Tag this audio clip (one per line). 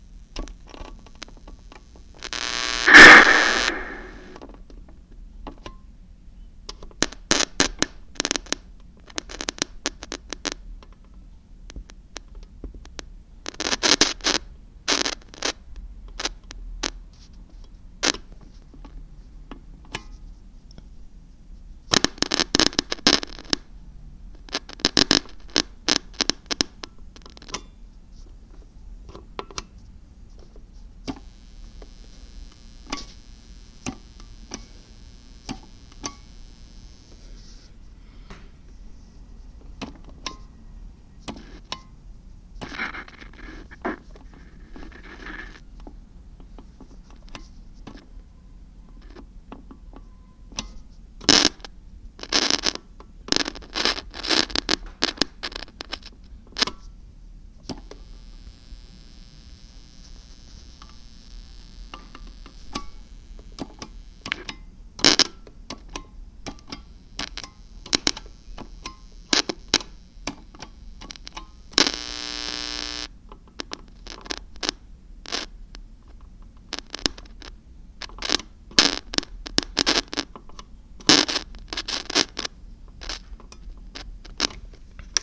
press
door
push
static
intercom
button
walkie-talkie
click